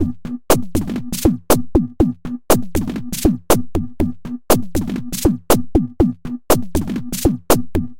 Aerobic Loop -02
A four bar four on the floor electronic drumloop at 120 BPM created with the Aerobic ensemble within Reaktor 5 from Native Instruments. Very danceable, very electro, a bit more lofi than 'aerobic loop -01'. Normalised and mastered using several plugins within Cubase SX.